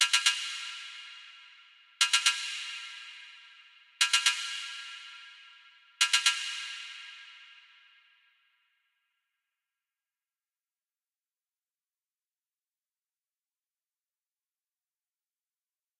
Metallic Chaos Closed Hats (120bpm)
A part of the Metallic Chaos loop.
Ambient, Close, Factory, hat, hi, hi-hat, Industrial, loop, MachineDroid, Metal, Metallic, Noise, Robot, Terminator